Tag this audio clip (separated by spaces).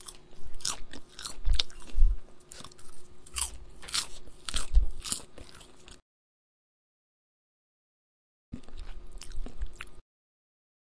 cleaning water dental hygiene